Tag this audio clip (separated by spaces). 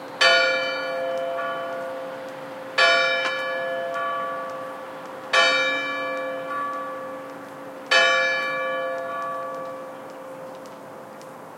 clock church church-bell bells cathedral ringing bell